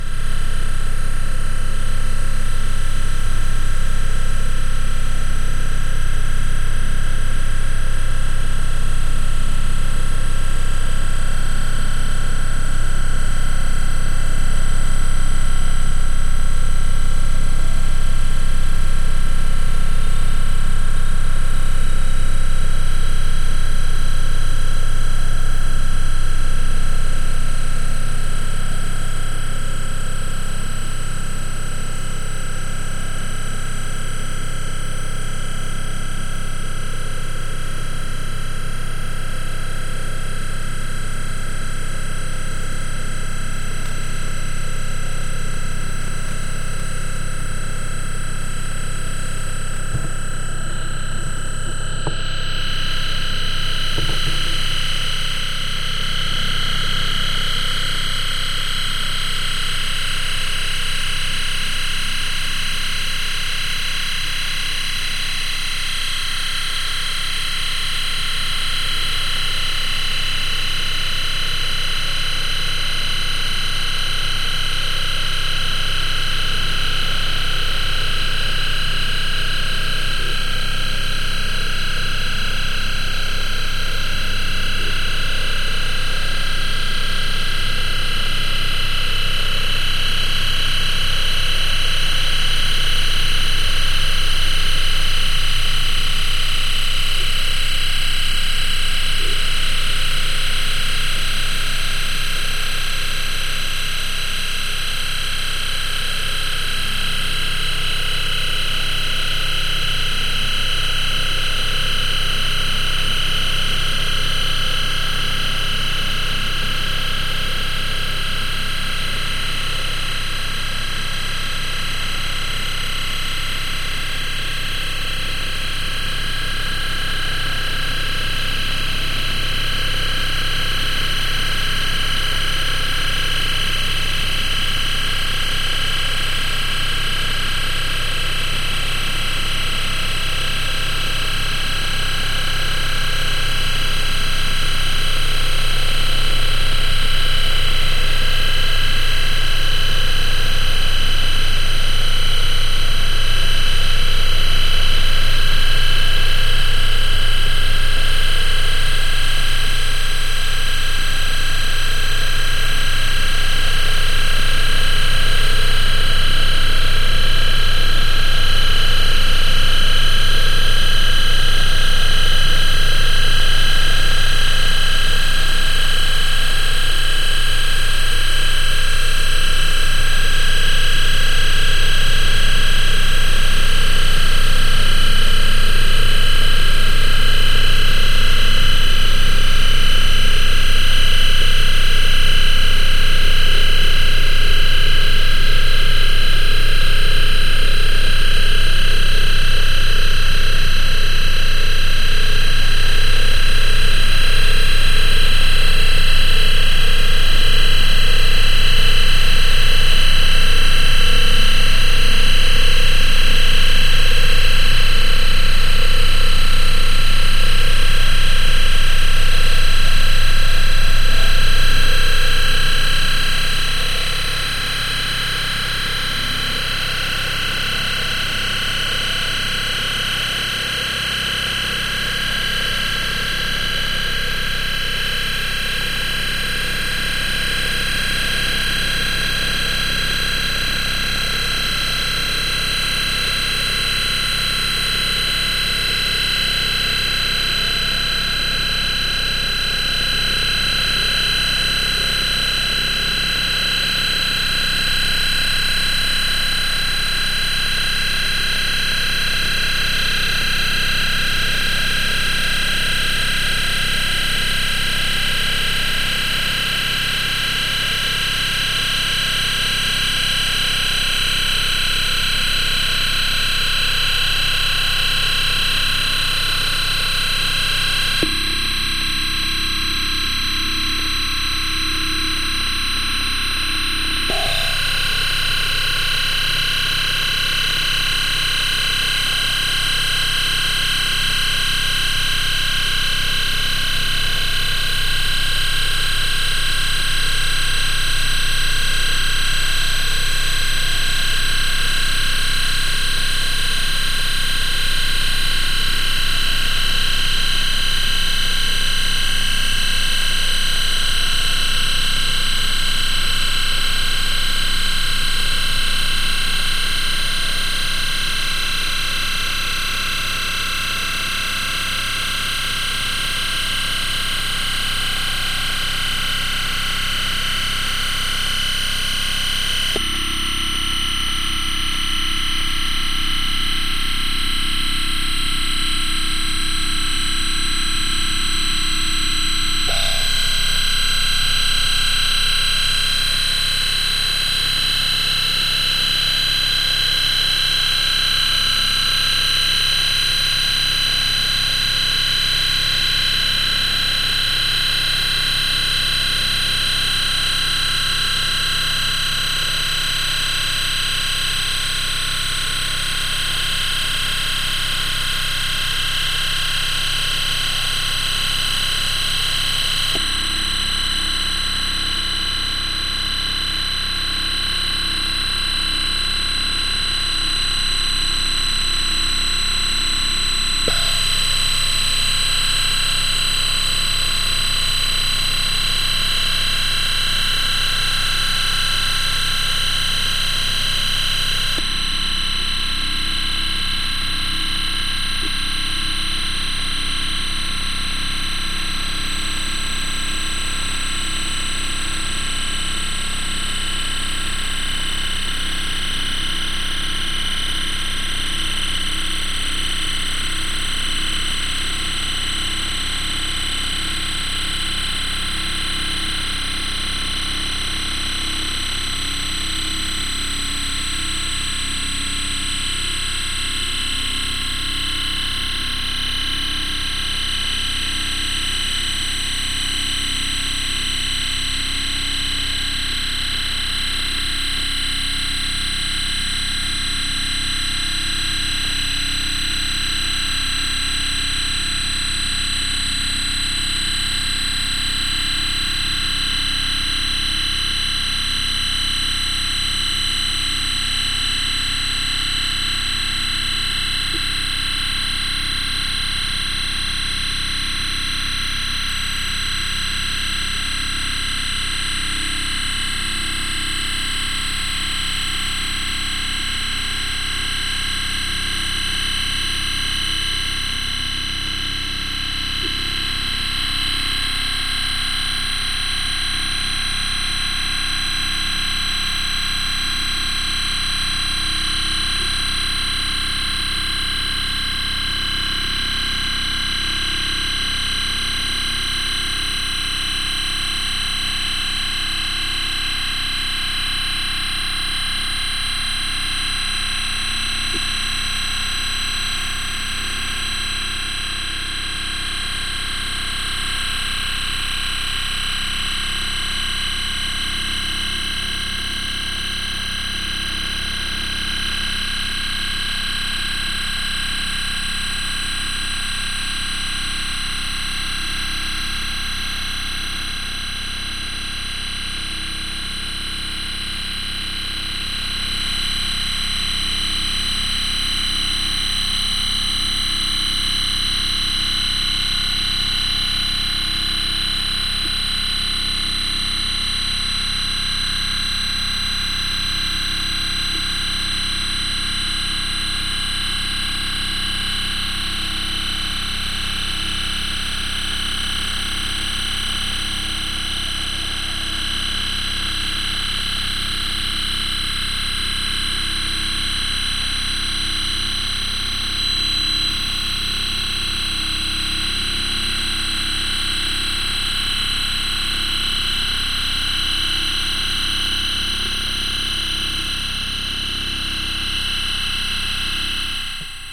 electromagnetic scan of a main railway close to the Dortmund TV tower.
high frequencies modulating and occasionally switching plus deeper tones at 200 Hz and lower.
recorded in Dortmund at the workshop "demons in the aether" about using electromagnetic phenomena in art. 9. - 11.